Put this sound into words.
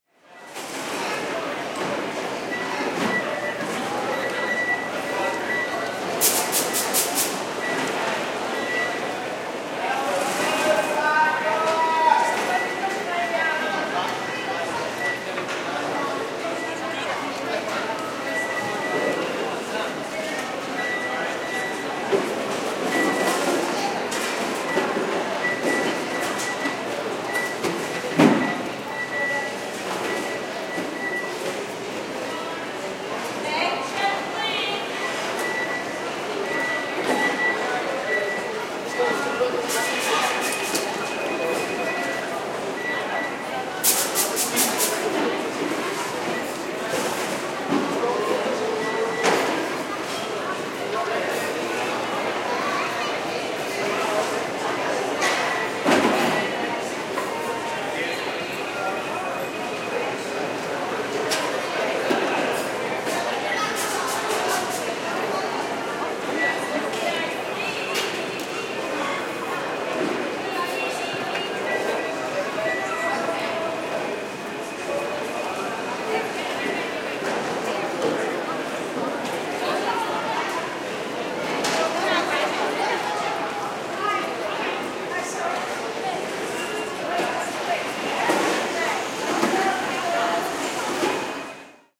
Passenger security screening at San Francisco International Airport (SFO). This recording was captured behind the Terminal 3 TSA checkpoint on July 10, 2008, with a hand-held Nagra ARES-M miniature digital recorder with the clip on XY (green band) microphone. The recording was taken from the same position as the 2006 version; note the sound of the newly-installed "puffer" machine (on the right) being used to screen selected passengers for explosives.